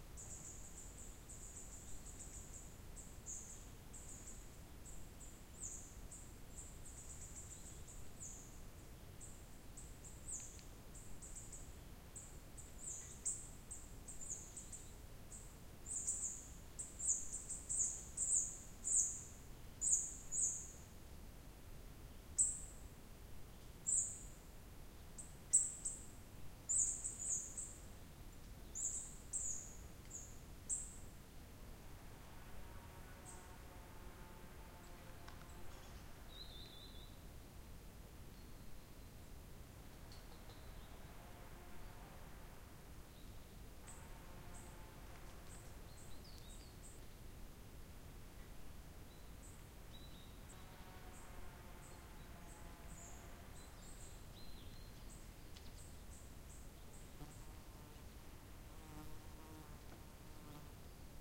ski resort, very quiet in summer I didin't have luck but the forest are crowded with all sort of birds
walking, birds